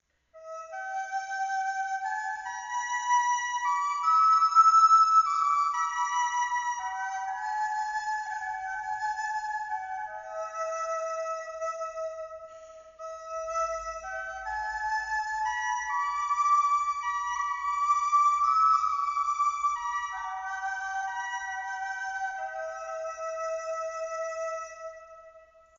little E samplefile reverb
This is a brief clip of one of my old flutes in the key of high E - with reverb. I am also making available this same clip without reverb. It's a native american flute that I got two years ago, I always felt like it had kind of a 'cold' sound. I am not sure of the wood it is made from, but it is a high flute which means it plays one octave above a standard native american flute. Ironically, this is one of my favorite clips that I ever recorded for it,and the last one because I wanted to have a sound clip available for Ebay when I sold it. It has a new owner now though so this will be the only clip I make available for this flute.